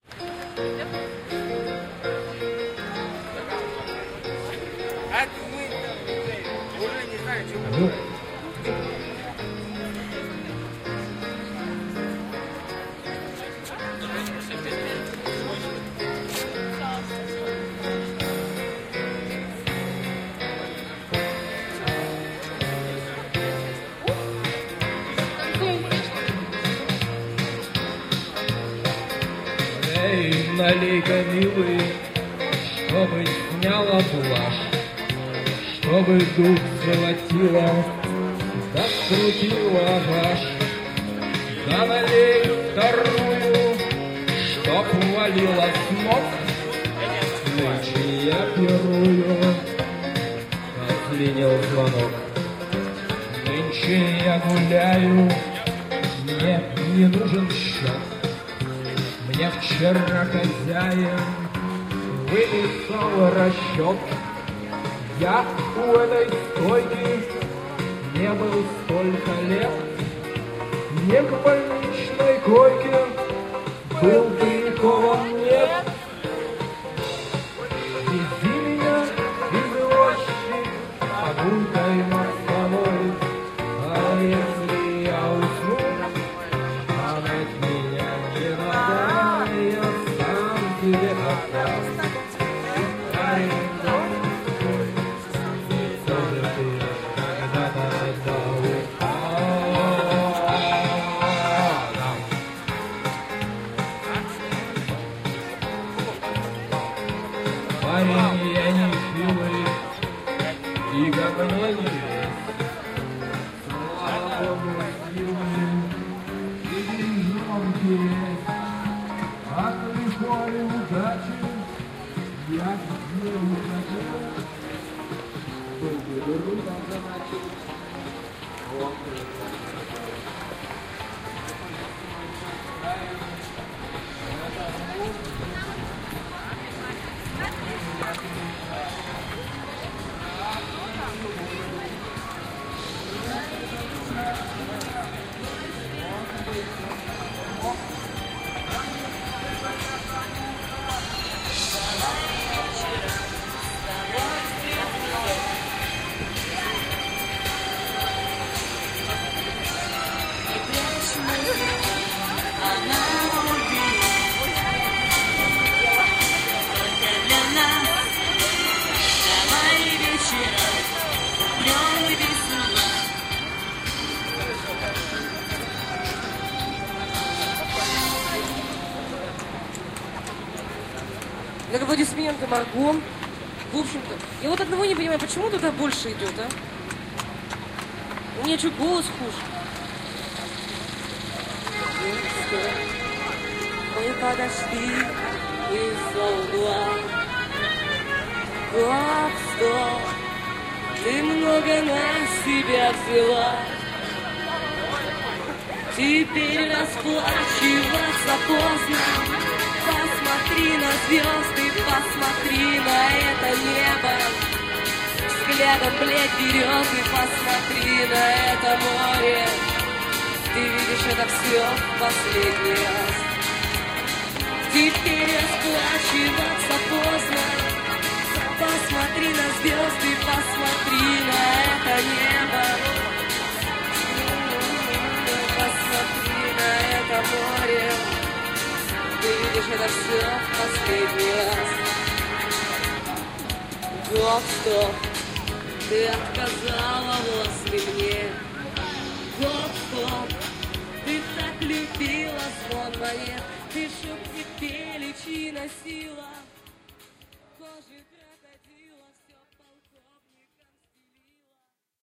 Karaoke on a sunny sunday by the shores of Gorodskoi Prud.
recorded may 2002 in yekaterinburg on minidisc with Soundman binaural microphones
russian, field-recordings, karaoke